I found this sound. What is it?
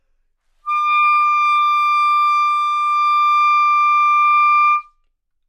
Part of the Good-sounds dataset of monophonic instrumental sounds.
instrument::clarinet
note::D
octave::6
midi note::74
good-sounds-id::665